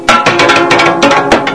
Koto
percussion. Recorded as 22khz
gourd, handmade, invented-instrument, percussion, koto